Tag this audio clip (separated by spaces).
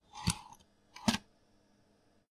Computers 2000 Disk Floppy-Disk PC Office 1990 Amiga Commodore Atari Retrocomputing Computer